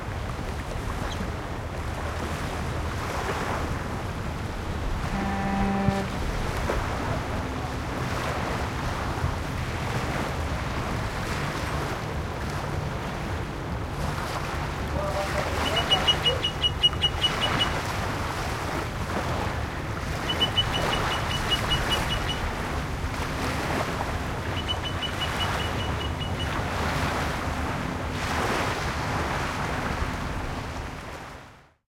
Australia - Ocean Water Laps CU Active w Ship Horn, Bird and People in BG
nature, field-recording, beach, ocean, ships, sea, birds